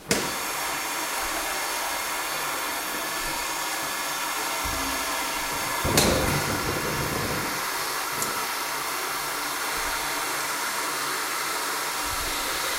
TCR My Sounds HCFR Donia,Emie,Maëlle robinet
Field recordings from Haut-Chemin school (Pacé) and its surroundings, made by the students of CE2-CM1 grade.
sonicsnaps, pac, france, TCR